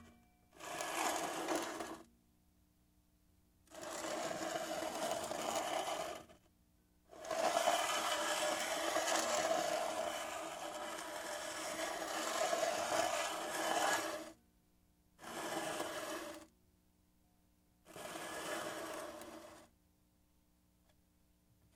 Empty soda can pushed across a hard surface.
Foley sound effect.
AKG condenser microphone M-Audio Delta AP